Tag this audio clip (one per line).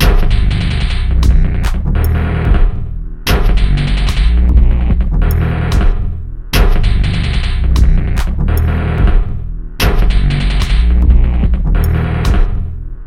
glitch-hop
loop
recordings
monome
rhythm
undanceable
experimental